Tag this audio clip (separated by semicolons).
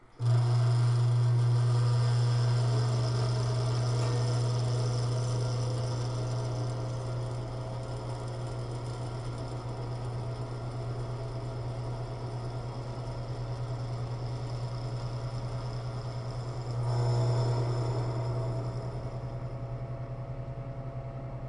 power-up; Heater; warm-up; buzzing; startup; start-up; powerup; warmup; powerful